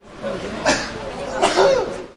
Someone sneezes two times.
sneezing, human, sneeze